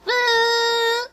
vocal party favor
I made this sound during a let's play, and it sounded like a party favor.
favor, party, voice